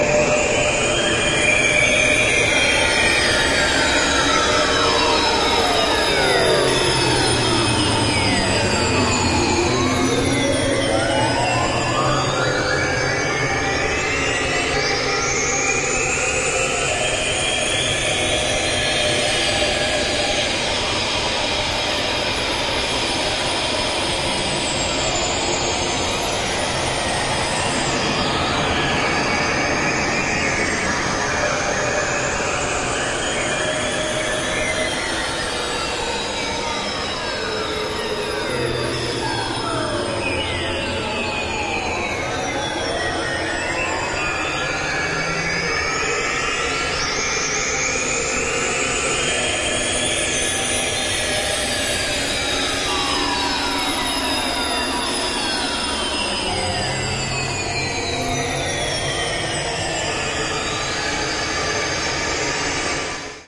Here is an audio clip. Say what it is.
Kitsch Theme
KINDA SCI FI THIS ONE These Sounds were made by chaining a large number of plugins into a feedback loop between Brams laptop and mine. The sounds you hear
are produced entirely by the plugins inside the loop with no original sound sources involved.
sci-fi, electronic